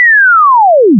Cartoon falling whistle vers.2
A digitally created slide whistle sound. The 101 Sound FX Collection
slide, slide-whistle, falling, cartoon, sproing, toon, whistle, boing, spring, fall